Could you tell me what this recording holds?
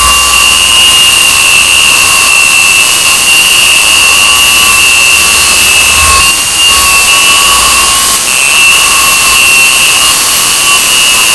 Made by importing misc files into audacity as raw data.
insanity, ouch, waow